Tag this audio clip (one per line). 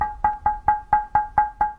Essen
Germany
January2013
SonicSnaps